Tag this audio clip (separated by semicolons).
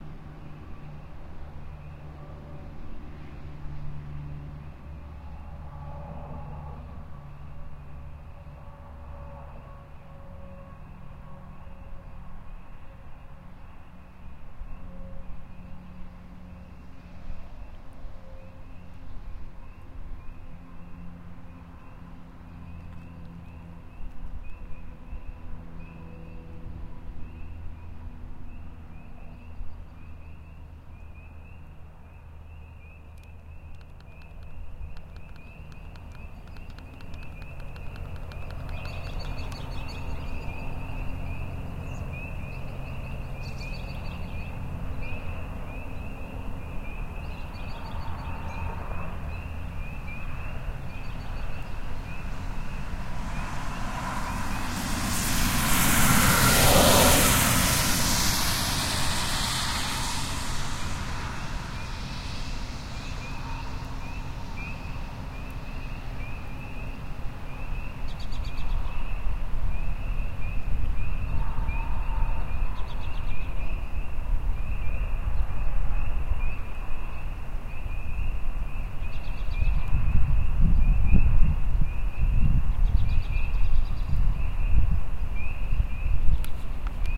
outdoor; outsidesound; ambience